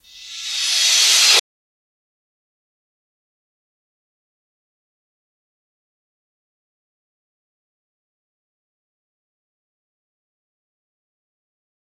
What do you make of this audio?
Rev Cymb 26
Reverse Cymbals
Digital Zero
crash, cymbal, fx, metal, reverse